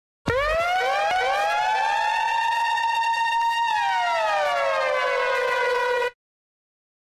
air raid.R
the classic air raid siren with a little delay on it.